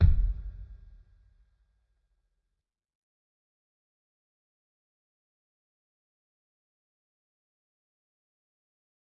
Kick Of God Bed 034
drum, god, kick, kit, pack, record, trash